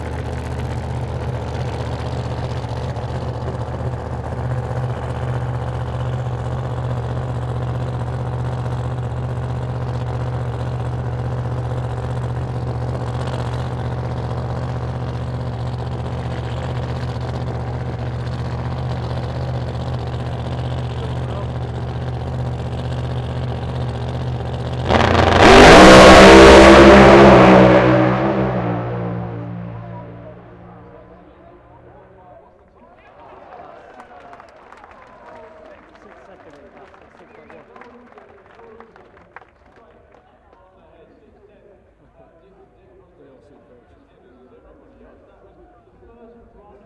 Pro Stock 1 - Santa Pod (C)
Recorded using a Sony PCM-D50 at Santa Pod raceway in the UK.